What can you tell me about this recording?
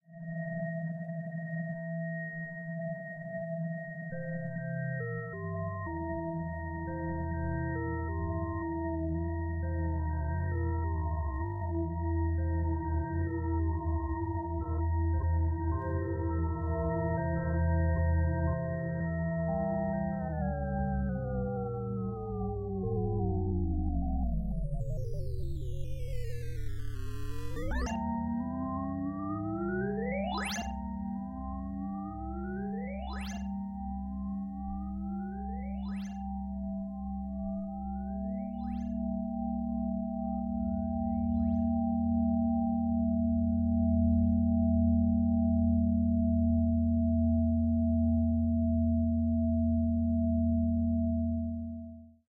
Dying Pad Evolved
Using 4ms SMR.